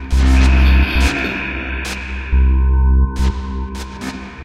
iamweird loop
weird loop i made for fun
effect, electronic, fun, loop, made, noise, sound, weird